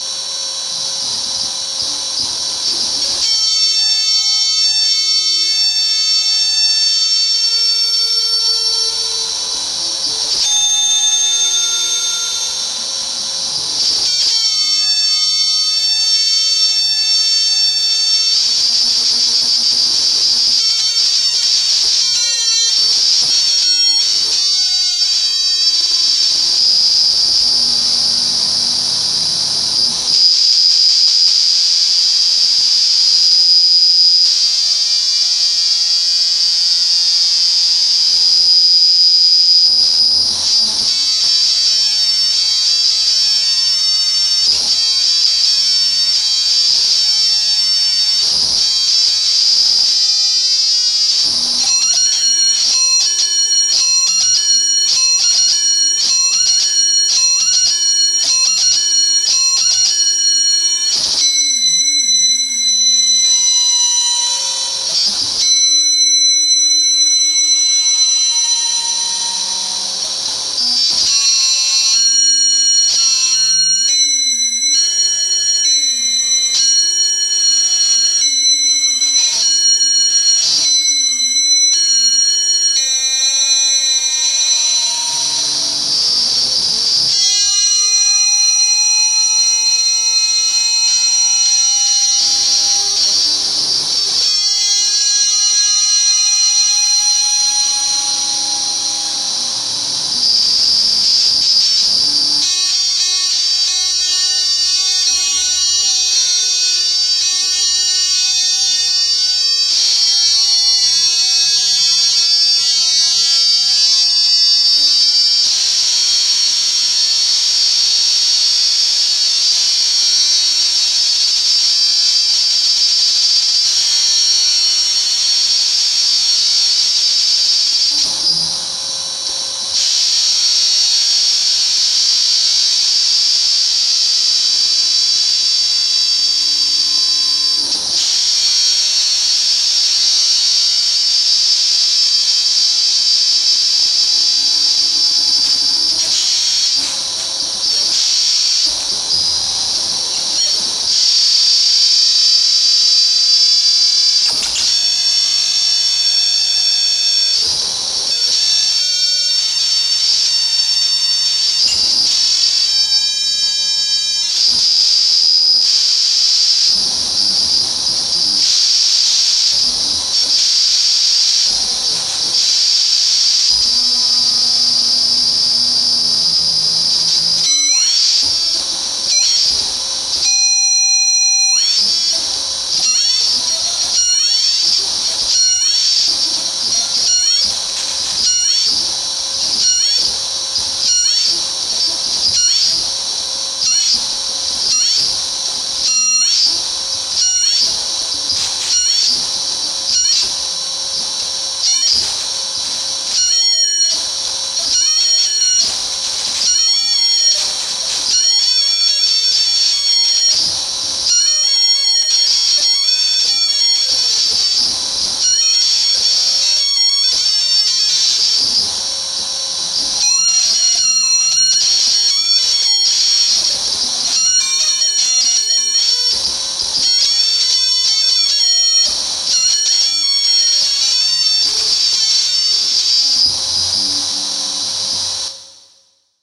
lots of cool ring modulated guitar noise
distortion, guitar, noise, ring-modulation